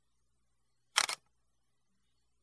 A camera shutter being pressed.